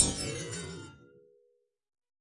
BS Hit 28
metallic effects using a bench vise fixed sawblade and some tools to hit, bend, manipulate.
Bounce, Clunk, Dash, Effect, Hit, Hits, Metal, Sawblade, Sound, Thud